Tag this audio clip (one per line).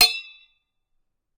bottle
ding
hit
metal
tink